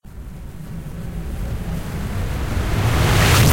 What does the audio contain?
Fade In Sound Effect
This is a sound that I changed, I think it would be great for the beginning of a stop motion.